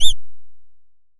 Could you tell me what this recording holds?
Attack Zound-62
A short electronic bird tweet. This sound was created using the Waldorf Attack VSTi within Cubase SX.
electronic, soundeffect